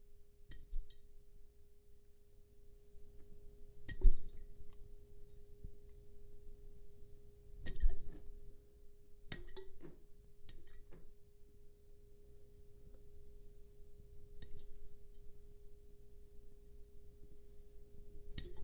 Grabbing Bottle
A half full 12 oz bottle being grabbed out of a hand.
bottle, grab, liquid, moving